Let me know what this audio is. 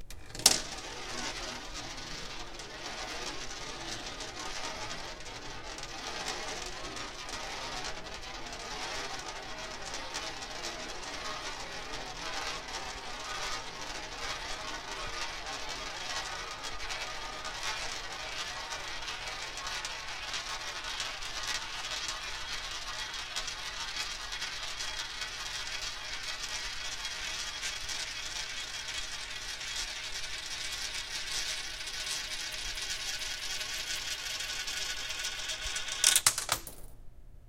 coin spiral
A recording of a coin rolling on one of those big funnel spiral things found at many museums, this one located at the Bay Area Discovery Museum in San Francisco. Recorded with the Zoom H4 on-board mic, a few inches above the center of the funnel.
click, coin, dime, funnel, ka-ching, museum, penny, roll, san-fransico